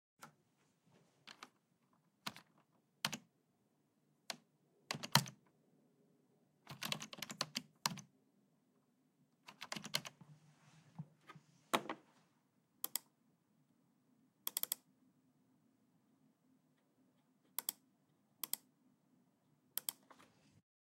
Keyboard and Mouse Sounds
buttons, click, clicking, clicks, computer, device, game, hacking, Keyboard, Mouse, pc, sfx, sound